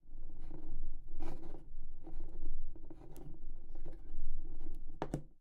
Sonido del balanceo de un objeto cilíndrico
Sound of a rolling cylindrical object
pinos, Rolling, bowls, chess-piece, Balanceo, bolos